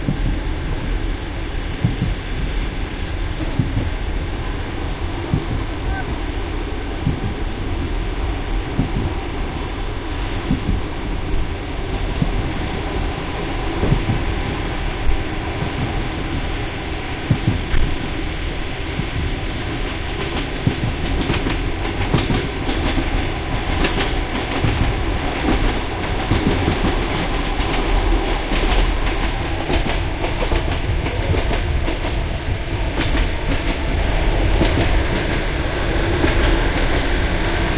Looking out the window of a train going from Kiev to Donetsk. You can hear the wheels squeeking against the tracks. And a little bit of the typical klok-klok sound you can hear in trains. A radio plays in the background and doors bang shut. I was looking perpendicular to the train, so you can hear the stereo of sounds coming from left to right. This recording has way too much bass and is a bit distorted.